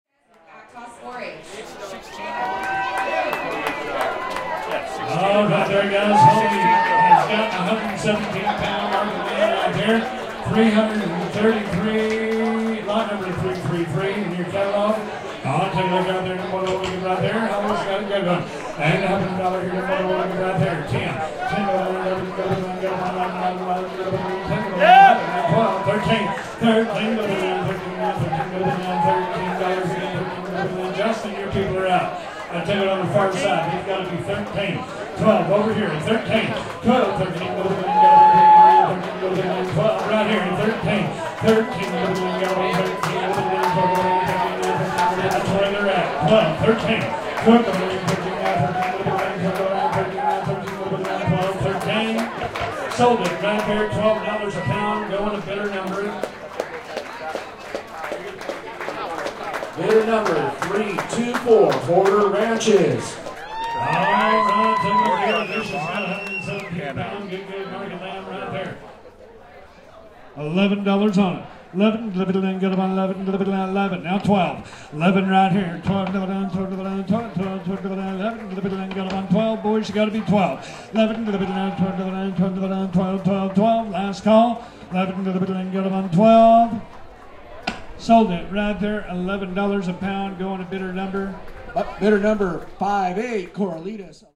4-H Club Lamb Auction, Santa Cruz County Fair
4-H Club Lamb Auction, 2021 Santa Cruz County Fair, California
4-H, animal, auction, auctioneer, barker, barn, bid, bidding, California, charity, county-fair, cowboy, exhibition, fairgrounds, farm, farmer, field-recording, herder, husbandry, lamb, livestock, market, meat, mutton, ranch, rancher, sale, sheep, youth